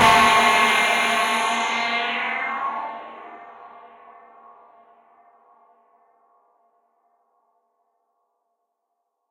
synth effect hit 2
cymbal, hit